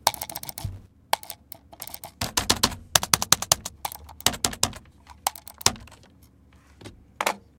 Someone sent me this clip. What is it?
Angry Mouse clicking and typing after pc breakdown
clicking, Mouse